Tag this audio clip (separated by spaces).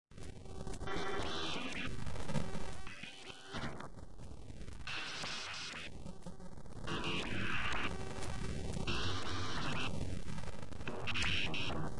strange noise digital